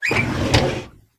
Desk-Drawer-Metal-Open-02
This sound was taken from an old metal office desk drawer being opened.
open, Office, Household, Drawer